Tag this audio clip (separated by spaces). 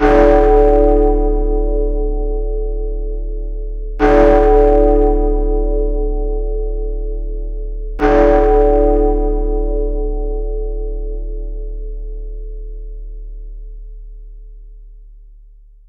three-oclock 3-bell-strikes tollbell three-bell-strikes